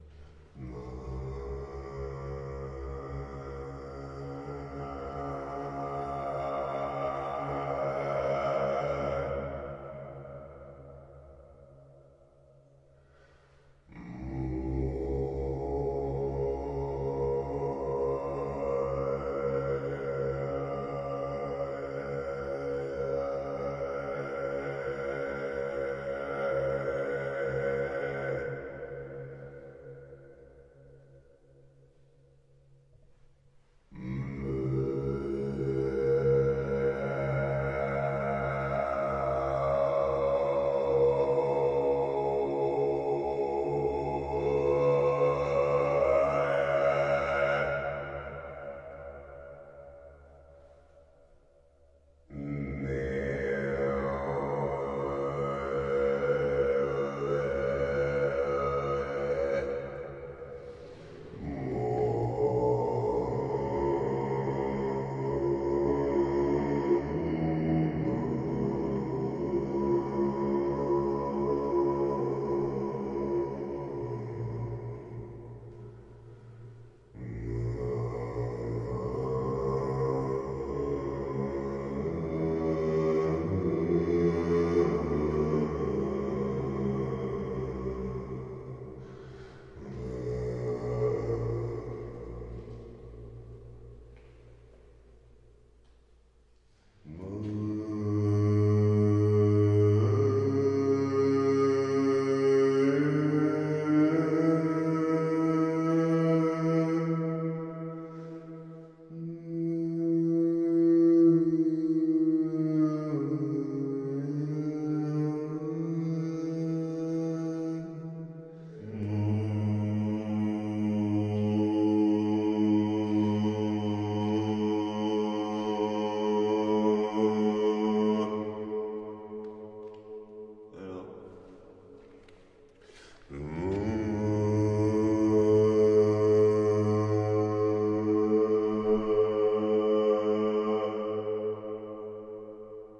01 chant bunker ORIGINAL
Male singing with natural reverb. Recorded with a Sony PCM-100 in a huge bunker corridor near the association "Neige & Merveilles", Wich is near to St-Dalmas-de-Tende, in France.
recording, field, natural-reverb, cool, voice, field-recording, reverb